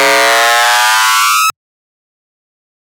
Raw synthetic alarm sound, totally dry. This is not modeled on any real sound, but created from scratch mathematically in Cool Edit Pro.